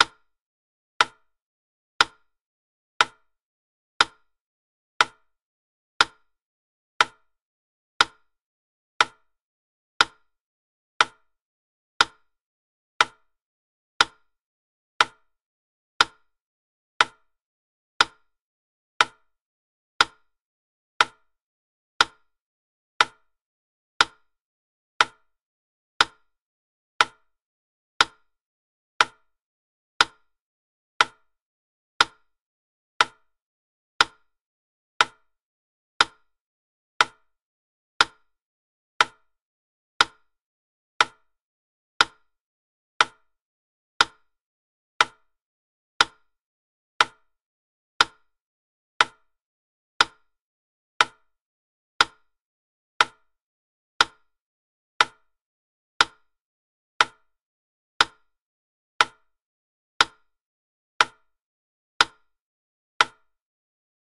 Wittner 60 BPM
Wooden Wittner metronome at 60 BPM, approx 1 minute duration.
60-bpm, clock, minutes, seconds, tick-tock, time, wittner-metronome